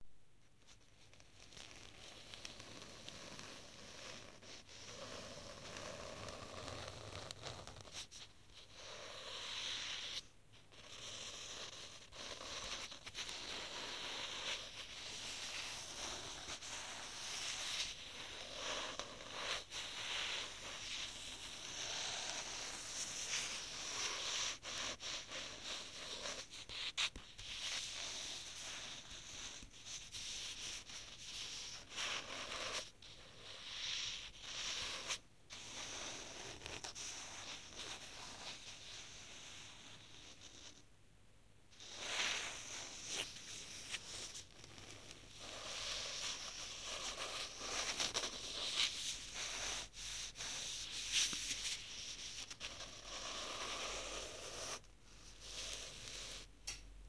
nails on paper
Nails scratching paper
carta, nails, paper, scraping, scratch, scratching, tracing, unghie